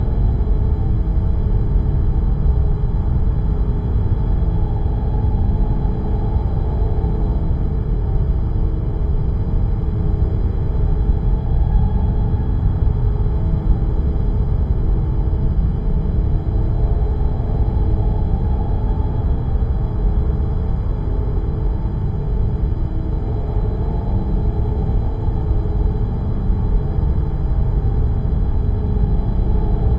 S L 2 Scifi Room Ambience 02
Ambience for a scifi area, like the interior of a space vessel.
This is a stereo seamless loop.